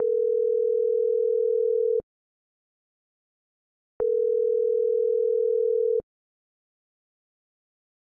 Ring Back Tone
This is an approximation of the modulated 440Hz tone you hear when waiting for someone to pick up the phone. Made using the Wasp synth in FL Studio.
440Hz, call, ringing, tone